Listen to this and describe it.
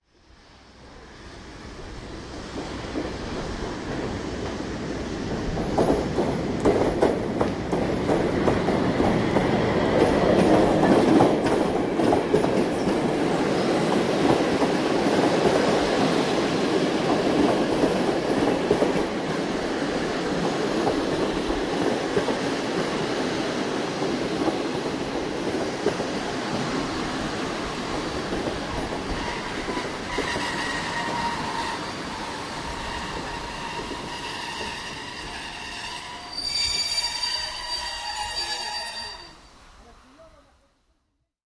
On the record: train arrives at the station. Recording on Zoom H1.
braking, locomotive, railway, station, train, wagons